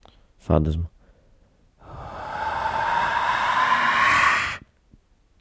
Rode microphone, evil soul
evil, ghost, paranormal